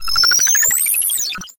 technical sound for "energy are filled up".